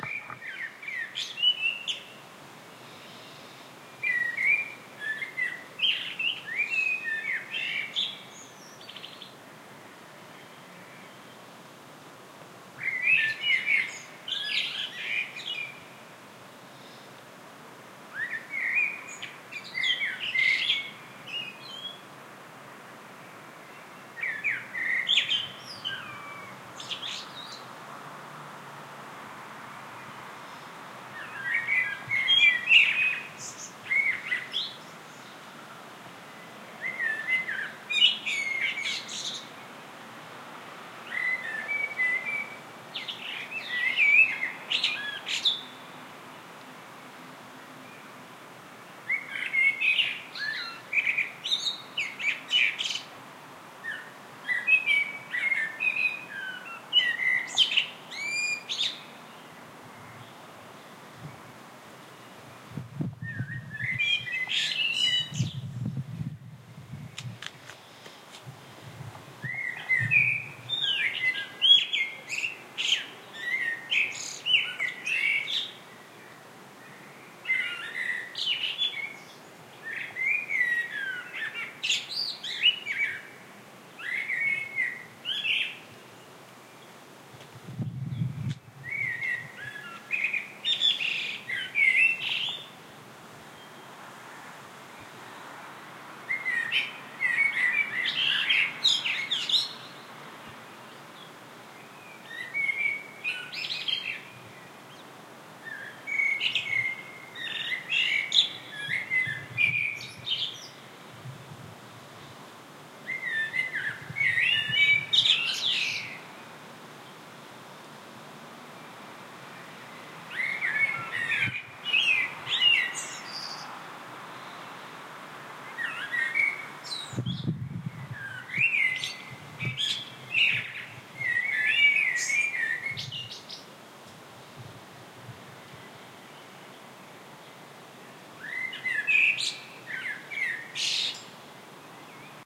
Pre-dawn birdsong and cars in the distance in backyard - Victoria, Australia. Use with care - some breathing and movement noise